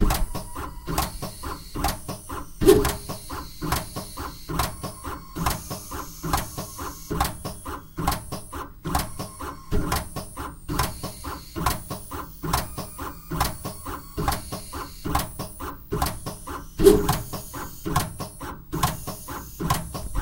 Automatic paste dispensing machine used in the manufacture of electronic circuit boards working in slow mode